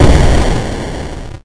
Retro video game sfx - Explode 3
A booming explosion.
8-bit, arcade, atari, bfxr, blast, bomb, boom, chip, chipsound, explode, game, labchirp, lo-fi, retro, video-game